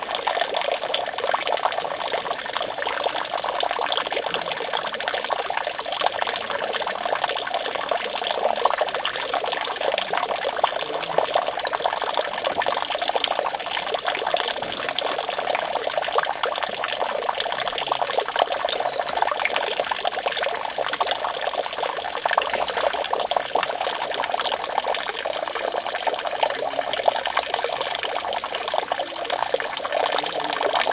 fountain trickling. Shure WL183 and Olympus LS10 recorder. Recorded near Paseo del Generalife, Granada, Spain